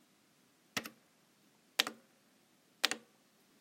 Button Press
Sounds of pressing a button or a switch.
button, click, press, radio-button, switch